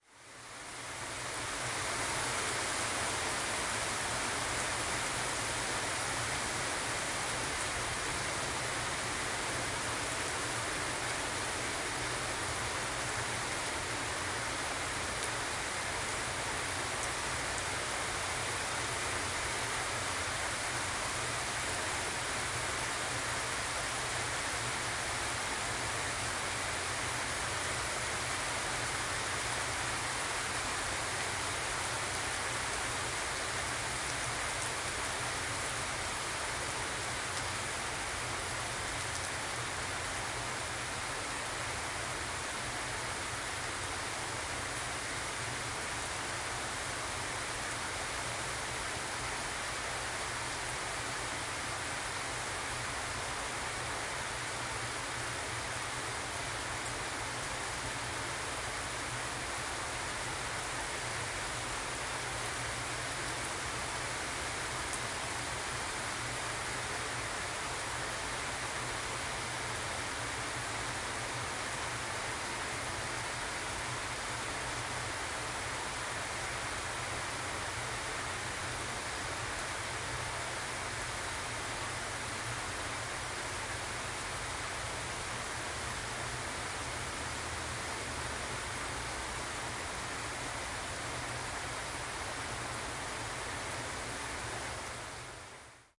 lmnln rain outside
NON-Binaural, just stereo, recording of rain recorded outside in the city of Utrecht.